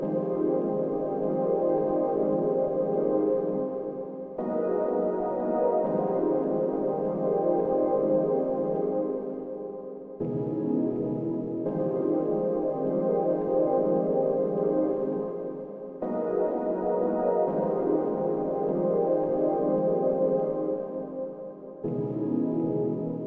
cum pad progression3 (consolidated)
soft, atmospheric, wavestation, pad, dnb, korg, rhodes, warm, chord, ambient, jungle